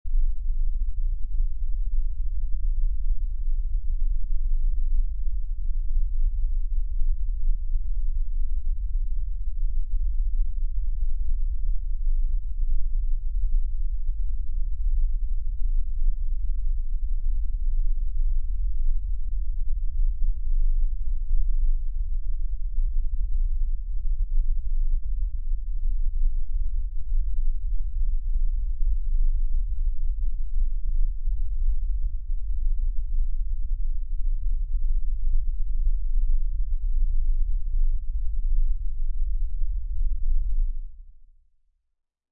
Here's a useful sub bass rumble for use as an ambience bed, or general rumble for film, game or other scenario.
Greetings from Australia!
bass, booster, Rocket, rumble, sub